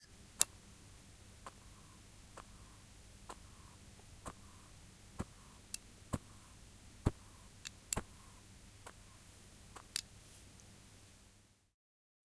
mySound WB Felix
felix, wispelberg